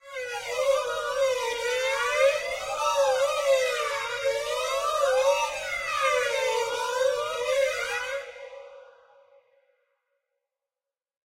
An effected violin.
fx, violin